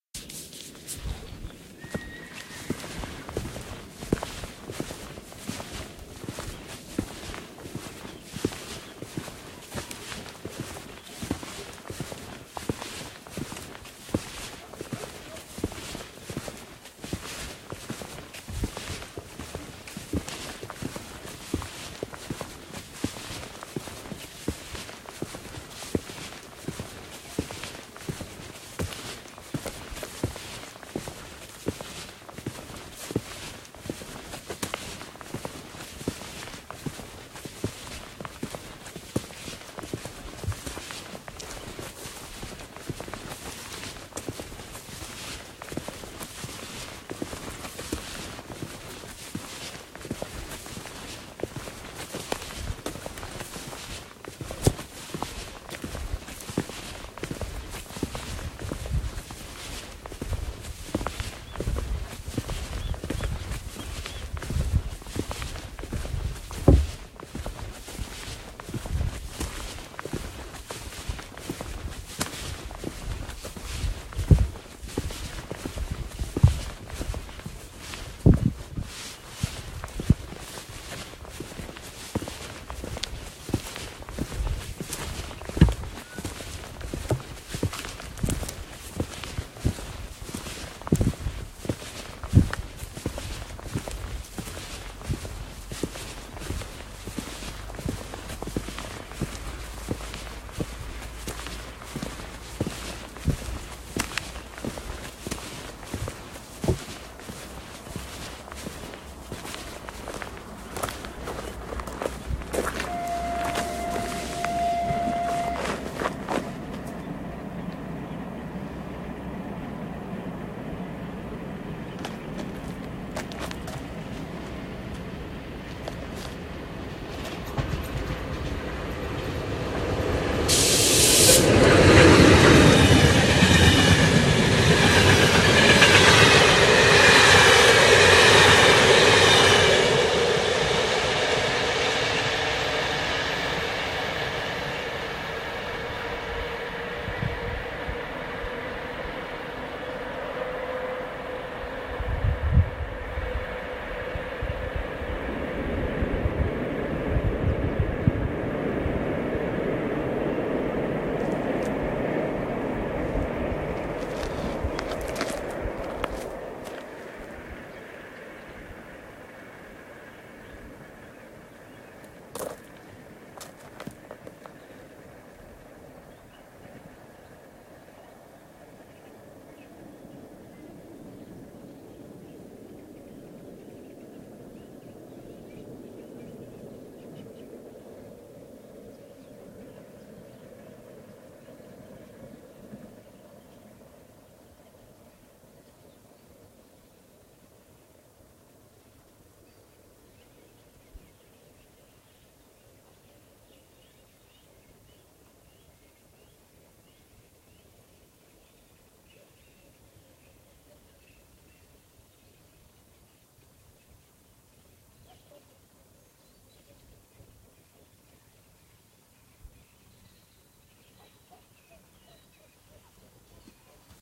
short train cross countryside + steps
countryside : steps - walking on beton, little walking on grit , horse, dog, dogs, birds
countryside, walk, steps, train, ambience